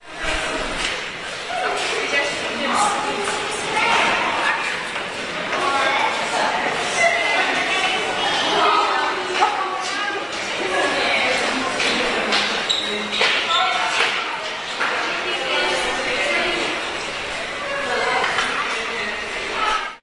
underground central station260610
26.06.2010: about 22.00. the underpass in the central station in the city of Poznan/Poland. sounds produced by people who got off some train.
more on: